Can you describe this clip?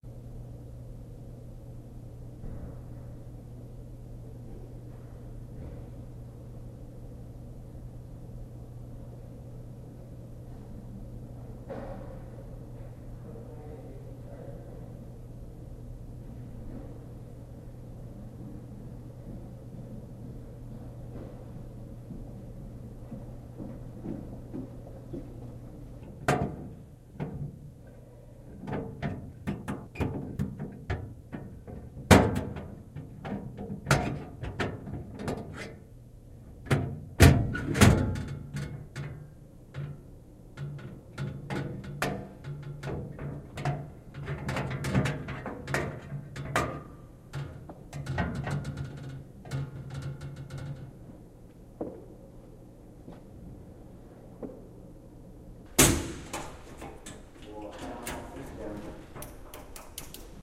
First 15 or so seconds is the general quiet ambiance of the men's locker room. I then walk up to my locker and unlock it. I then close it, from an exterior point of view.